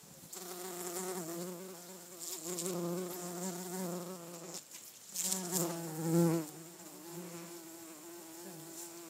Bees buzzing around wildflowers on the island of Kökar in Åland, Finland. Sound recorded with iPhone 4S internal mic.